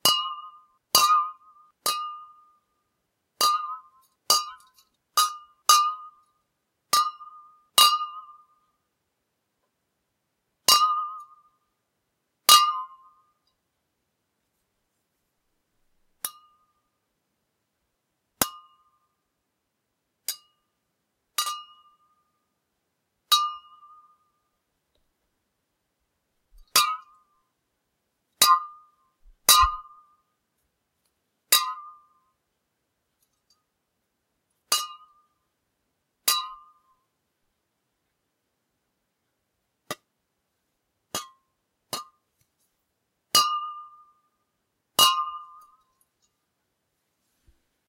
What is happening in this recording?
Wobbly Can Tings
I spanked a can of deodorant with a pair of nail clippers.
It was a very naughty can of deodorant.
tin, metallic, clang, ting, metal, ding, clank, can